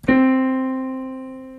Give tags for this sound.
Do Piano

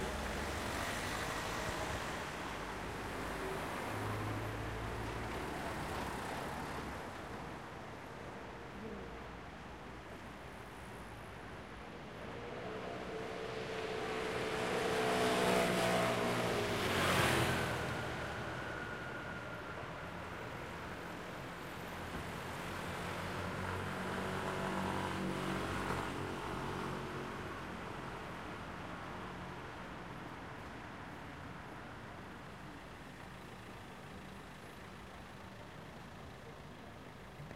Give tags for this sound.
field-recording Paris sonic TCR water

